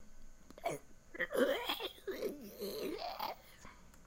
Part of the sounds being used in The Lingering video game coming soon to PC. Created using Audacity and raw voice recording.
Apocalypse, Creature, Growl, Horror, Moaning, Monster, PostApocalypse, Roar, Scary, Scream, Survival, VideoGame, Zombie